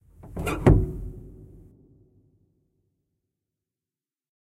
Faint squeak as a piano pedal moves back up to its standard position. Bass as the pedal hits the wood, then faint detuned high string reverberations can be heard. Church-esque organic reverb.
100+ year old upright piano, microphone placed directly inside. (Sample 4 of 4)